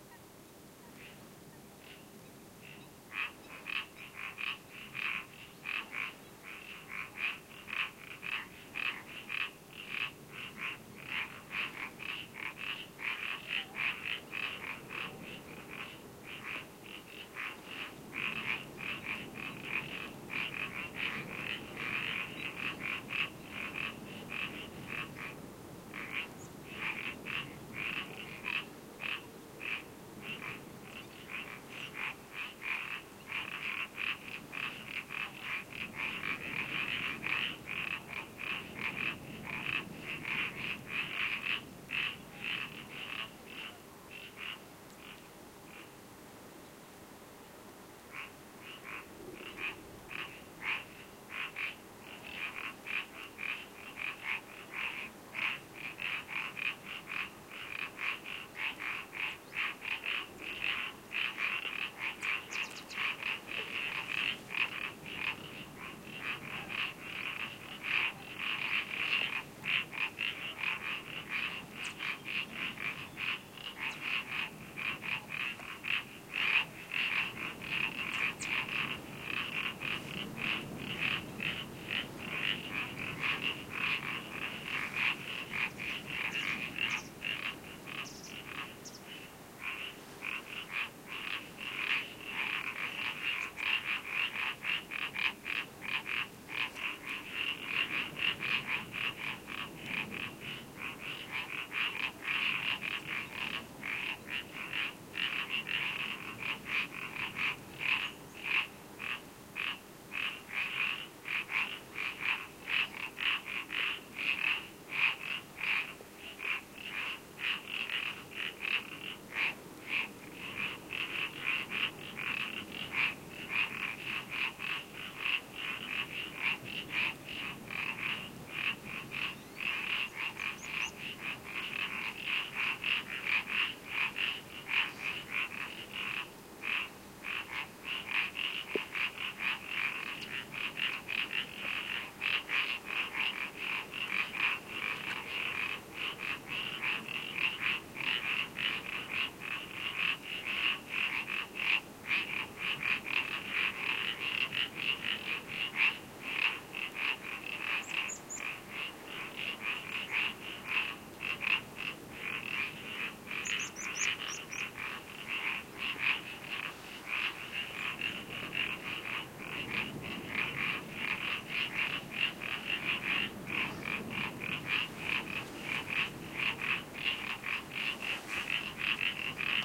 marsh frogs calling near Centro de Visitantes Jose Antonio Valverde (Donana National Park, S Spain)
ambiance, autumn, birds, donana, field-recording, frogs, marshes, nature, pond